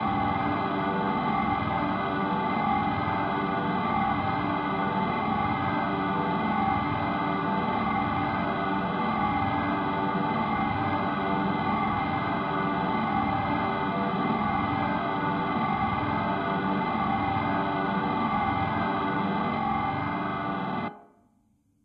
This is a drone created in Ableton Live.
I processed this file:
using Live's built in Ressonator effect (tuned to C#) followed by an SIR (And Impulse Response) effect.